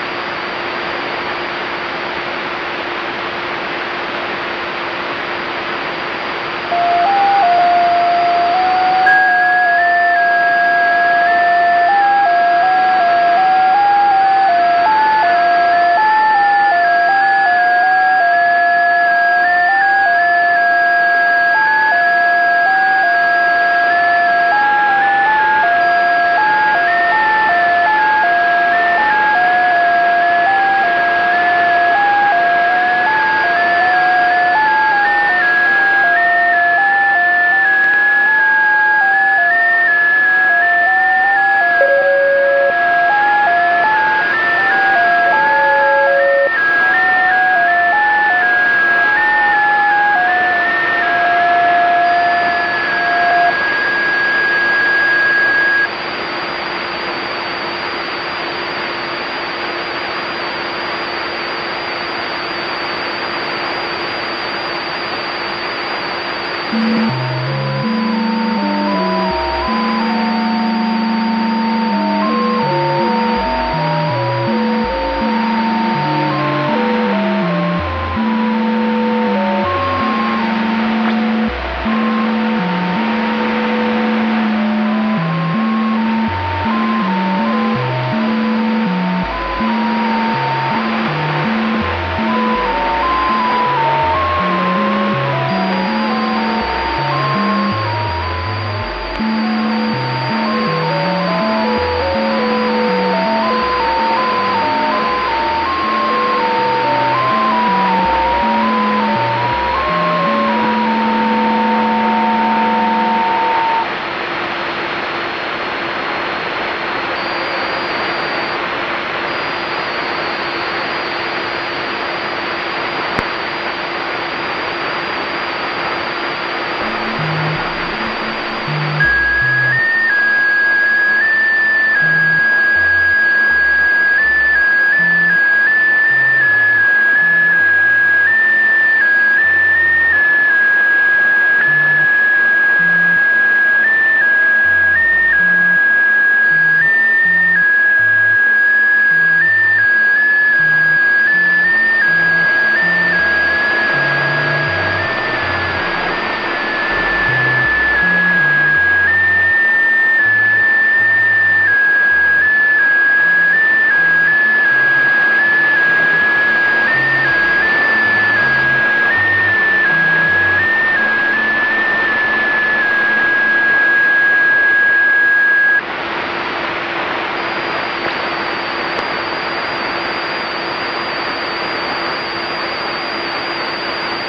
Another RTTY radio signal
electronic, noise, ham, rtty, shortwave, signal, static, radio